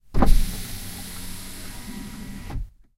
Passat B5 Window DOWN
VW Passat B5 electric window opening, recorded from inside the car.
sliding, volkswagen, window, field-recording, power-window, automotive, car, electric-motor, open, electric-window, passat-b5, binaural